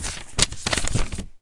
"And here's your receipt."
Recorded for the visual novel, "The Pizza Delivery Boy Who Saved the World".